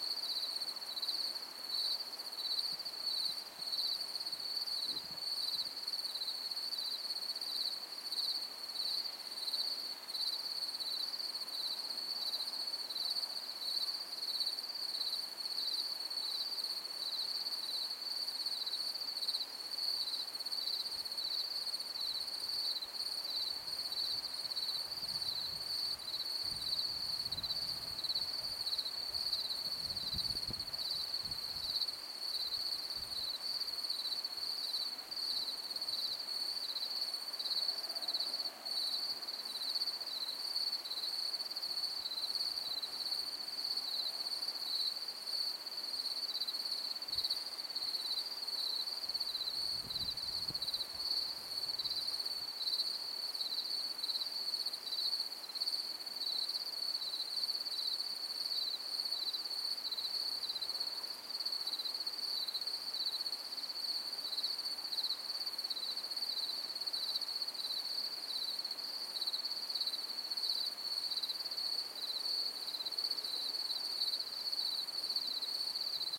Recording of summer evening's crickets